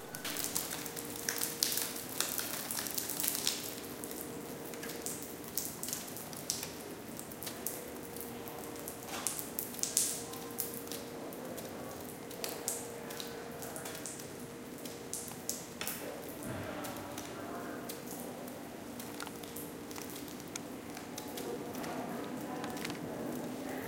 Water falling from the ceiling onto a hard tile surface and echoing.
drip, dripping, leak, liquid, splash, tile